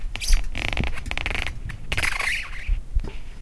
records, oneshot, punch, zoom,